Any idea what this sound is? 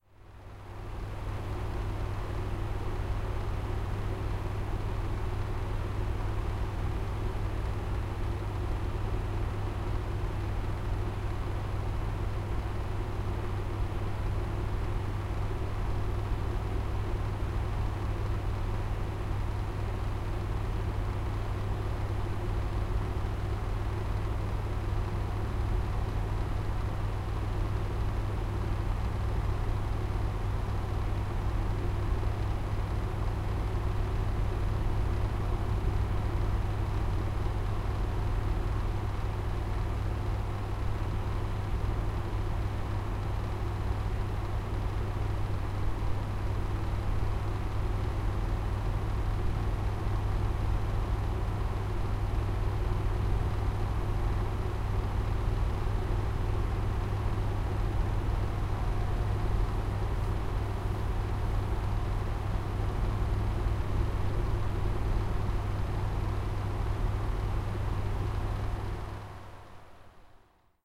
Air Conditioner Fan Hum
This sound can for example be used in games, for example when the player is wandering around in office environments - you name it!
If you enjoyed the sound, please STAR, COMMENT, SPREAD THE WORD!🗣 It really helps!